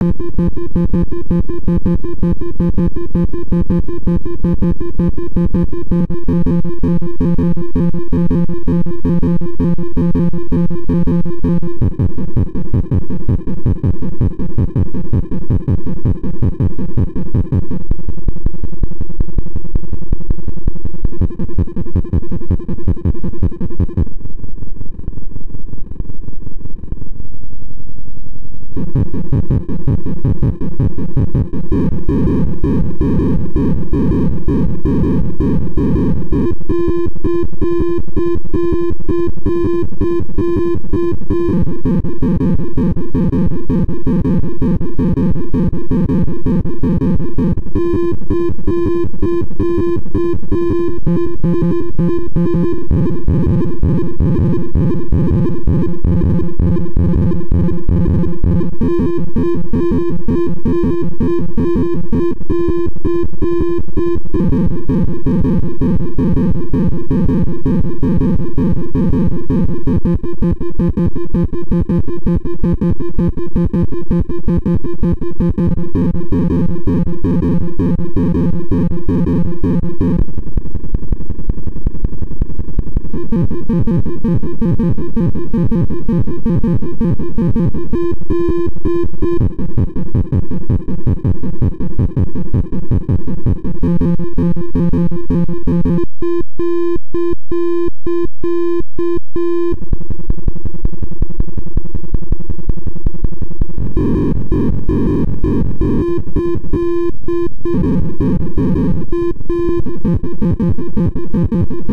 This is a complex couple of seconds that I got out of BitWiz. You can probably take a few seconds out here and there to get a bizarre bit of high-paced music together. Thanks!
rave, bitwiz, 8bit, glitch, idm, dance